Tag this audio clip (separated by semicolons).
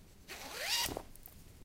closing; clothing-and-accessories; opening; zipper